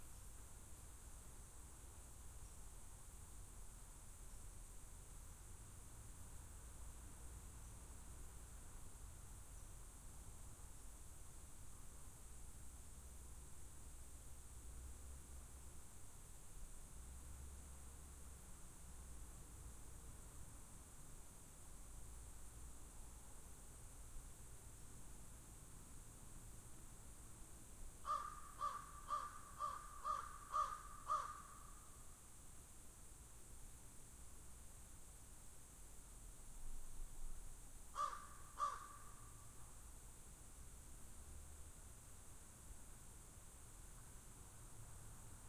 Meadow insects, crow caws. This sample has been edited to reduce or eliminate all other sounds than what the sample name suggests.
field-recording, crow, insects